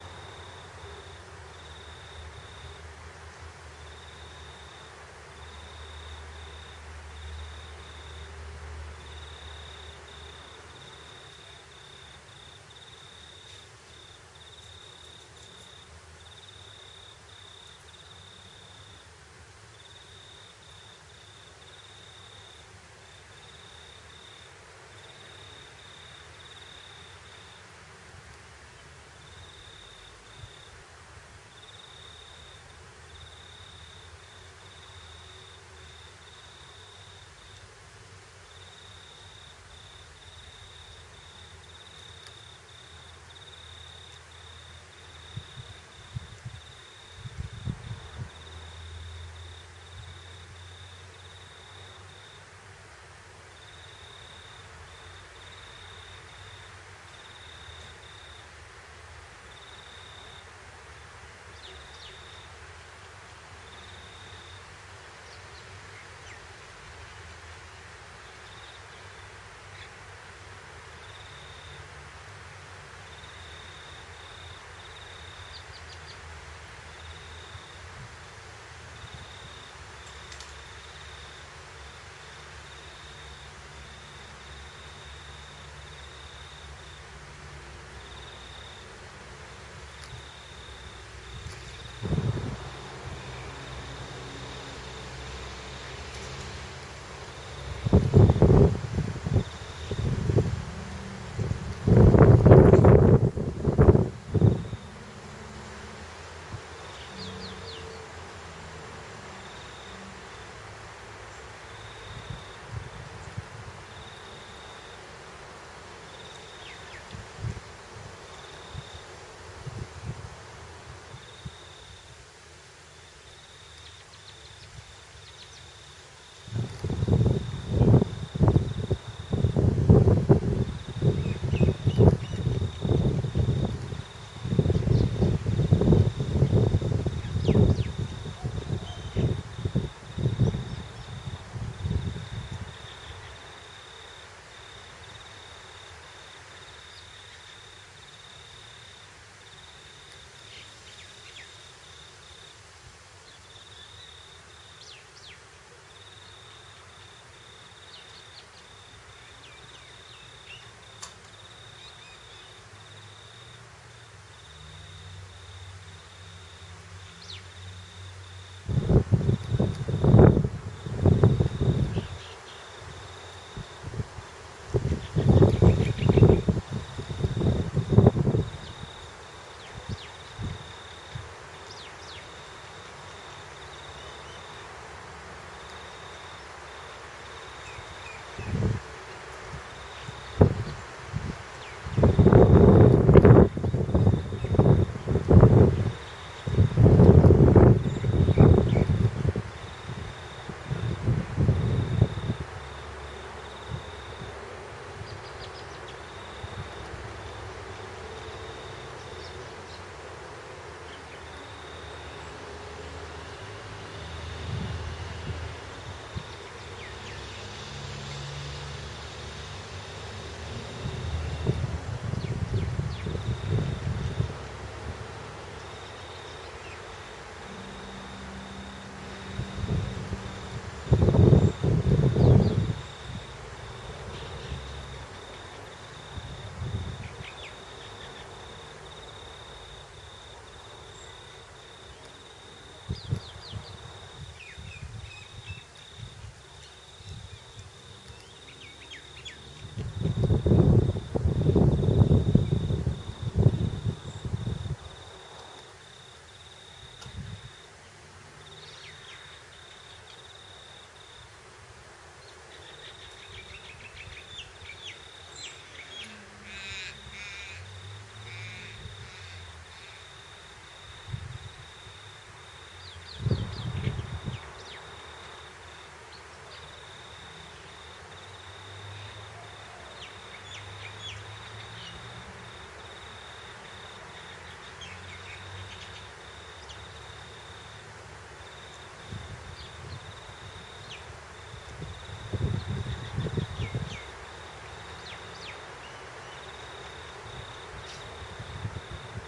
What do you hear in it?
Suburban evening
The sounds of a suburb in the evening with the peaceful sound of crickets, distant traffic, and birds all at the same time. There is a bit of wind noise in the middle section of the recording.
suburban, traffic, dark